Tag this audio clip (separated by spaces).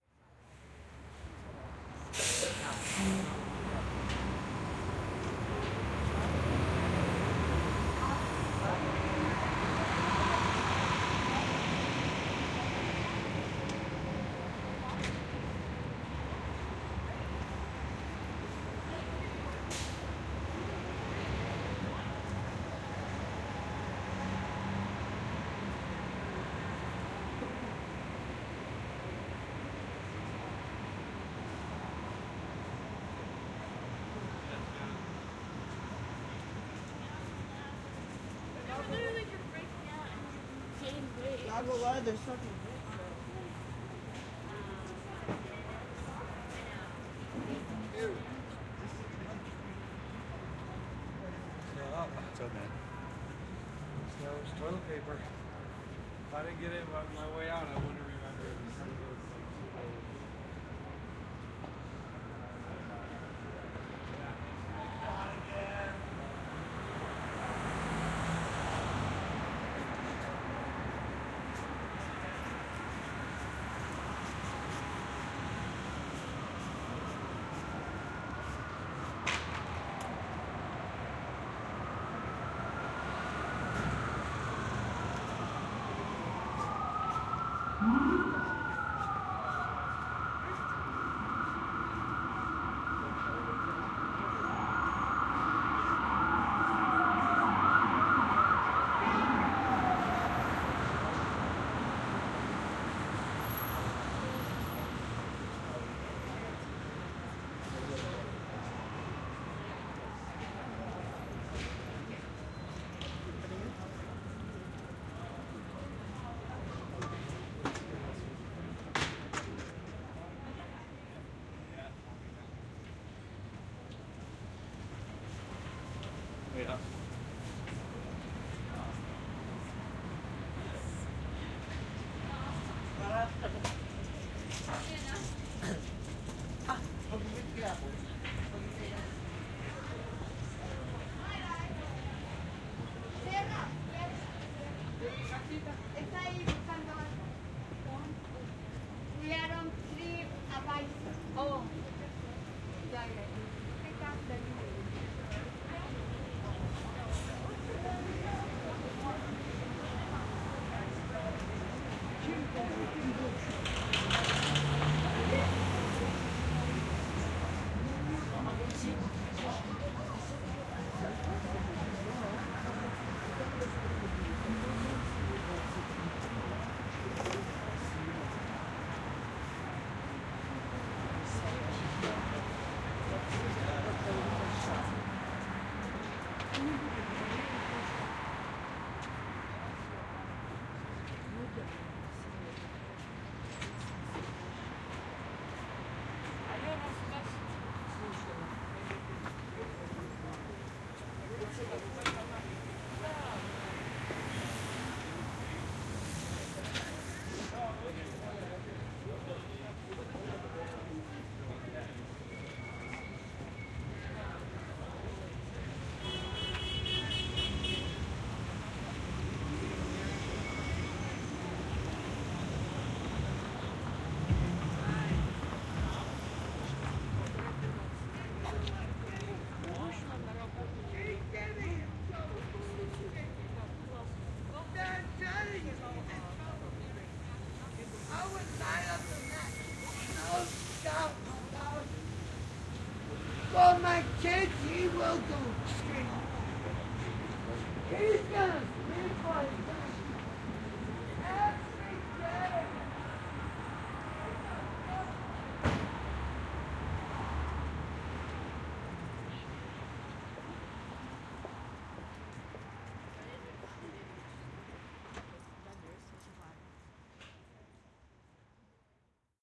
atmospheric binaural cars city field-recording street-noise street-recording